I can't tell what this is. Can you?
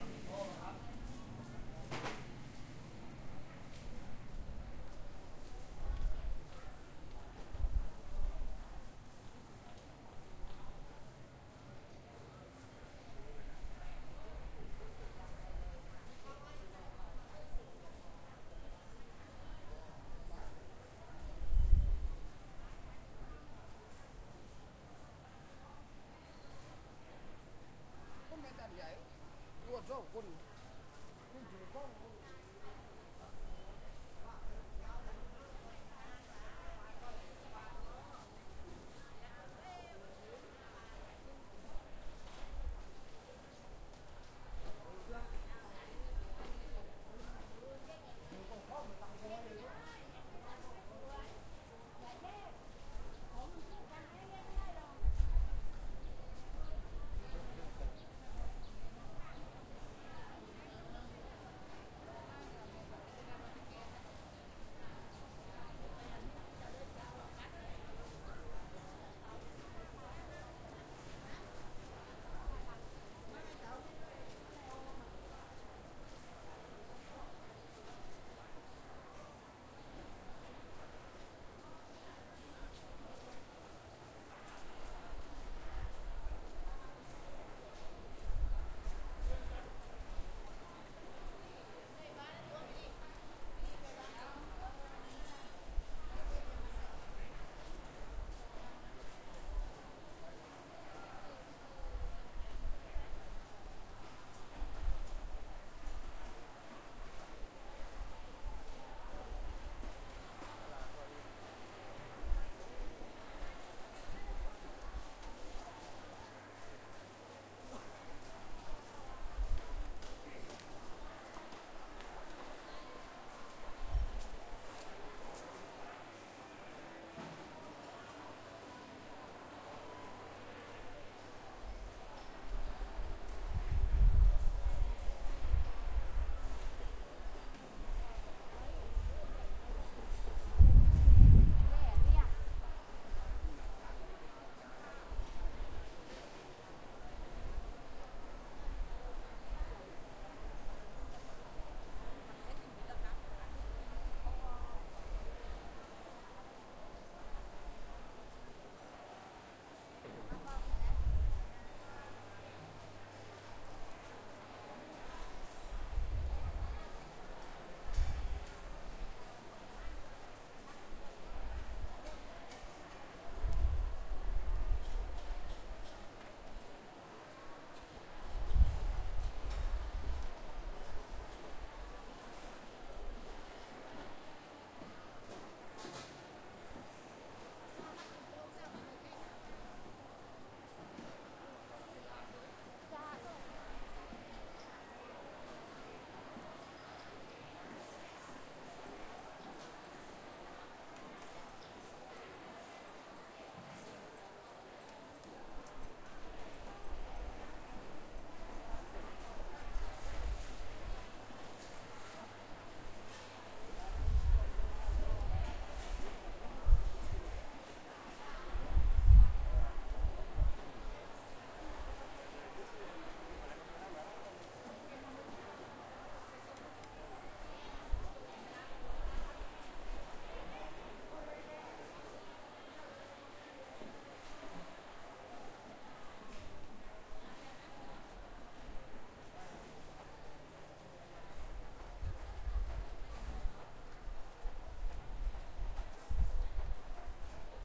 Maharaj Market, Krabi, Thailand
Market environment, Sellers shouting, People buying and selling.
Recorded the 14/11/2013, at 9:20 am.
buying, freshmarket, market, people, selling